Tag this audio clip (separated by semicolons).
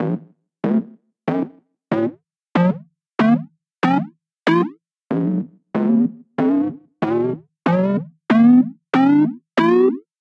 8bit; aua; boo; enemy; fail; game; gaming; mario; ouch; push; ram; super; wrong